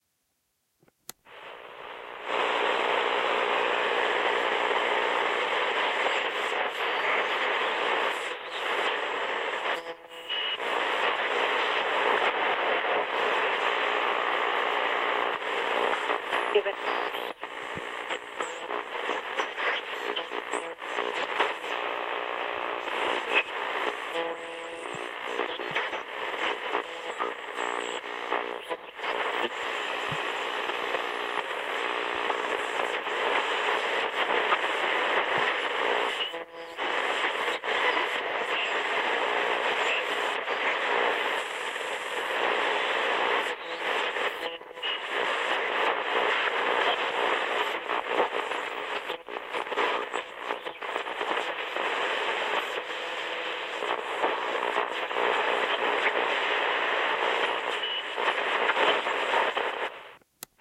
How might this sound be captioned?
Tuning AM on a cheap radio.